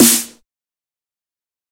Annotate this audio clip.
Dubstep Snare 4
A lighter dubstep snare that has a nice sized trail to it.
processed, punchy, hop, glitch, hard, heavy, Dubstep, dnb, hip, FL-Studio, skrillex, drum-and-bass, pitched, snare, adriak